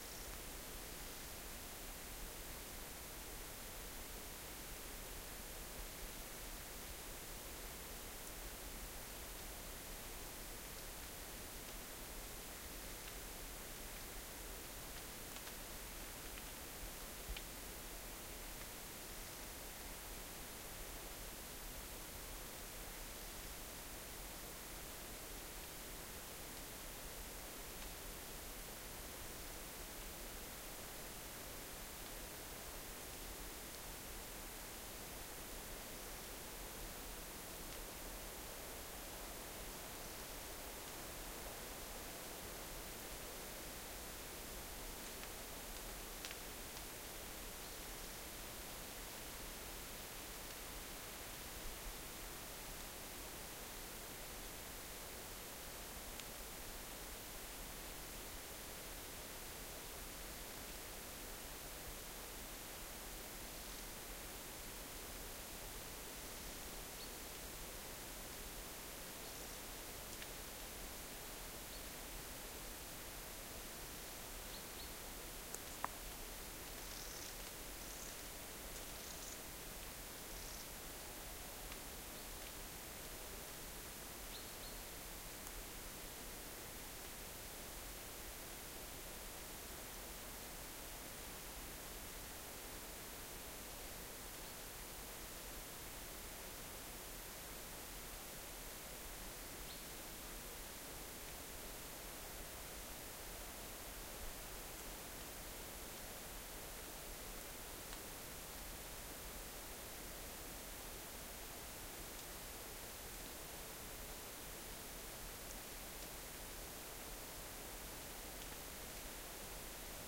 Autumn Forest Wind. Heavy, fast gusty in tall pine trees. Some birds and raindrops.
wind, field-recording, birds, trees, rain-drops, ambient, nature, forest, leaves, white-noise